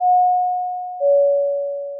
More smooth and quicker ring.
sine expression
sin(2*pi*t*725)*exp(-t*5)*(1-exp(-t*30))+(step(t-5)-step(t-.3))*sin(2*pi*(t-.3)*565)*1.3*exp(-(t-.3)*5)*(1-exp(-(t-.3)*30))